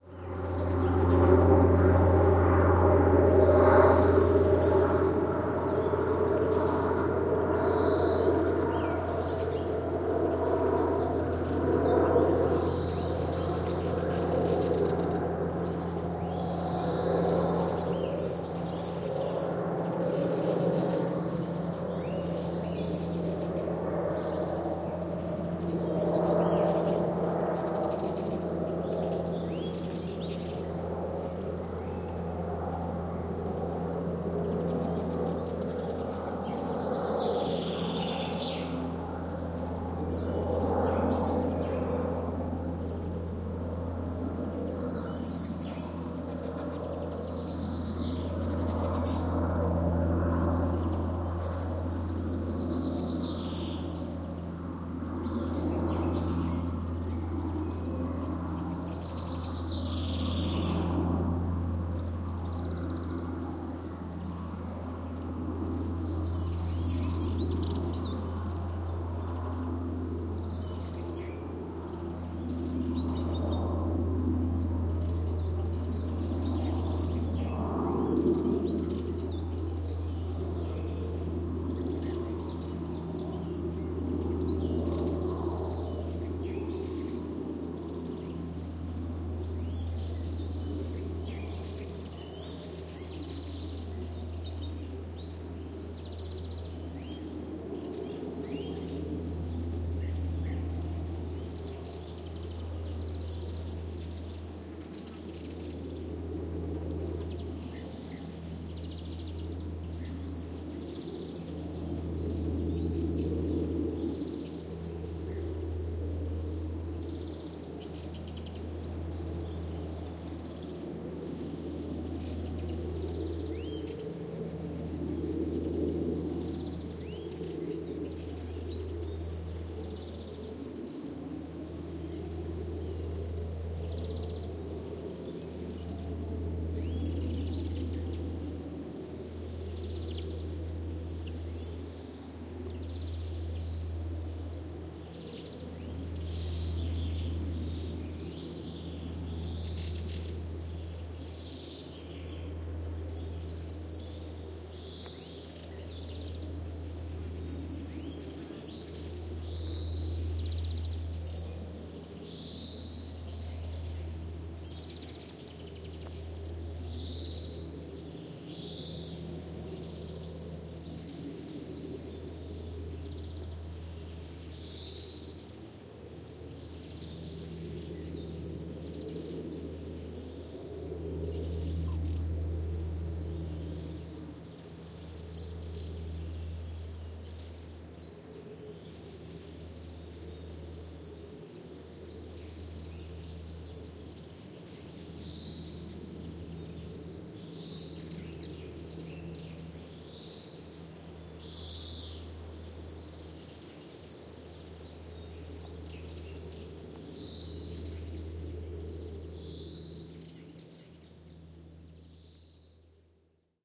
20160610 fading.plane.forest
Light aircraft fading out, forest ambiance in background. Sennheiser MKH 60 + MKH 30 into Shure FP24 preamp, Tascam DR-60D MkII recorder. Decoded to mid-side stereo with free Voxengo VST plugin
engine, birds, field-recording, aircraft, ambiance, motor, airplane, forest